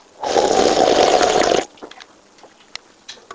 This is the very loud slurping of a soda.
drink, slurp, soda